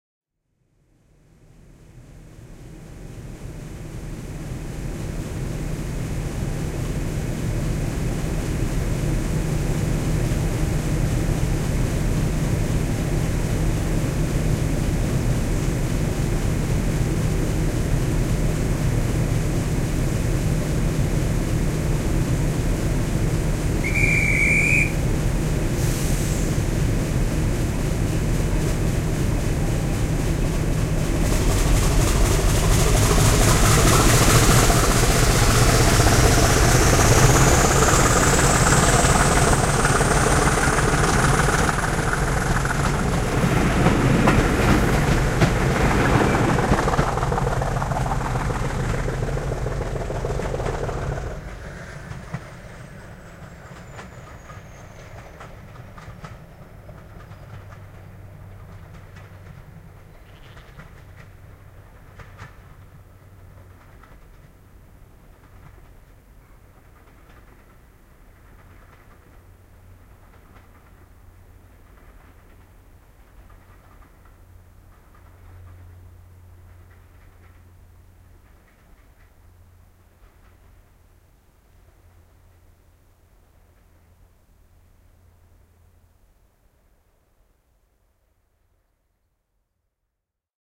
East Lancashire Railway (ELR) 19-9-2015 Class 40 diesel departing Irwell Vale Halt, Rossendale, southbound for Ramsbottom and Bury. Recorded on the station platform using a Tascam DR-60D with a single Audio Technica AT8022 stereo XY microphone and Rycote wind jammer.